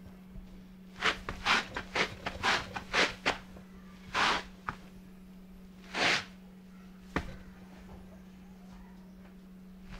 wipe feet on doormat
wiping shoes on a doormat
doormat, foot, mat, welcome, wipe, wiping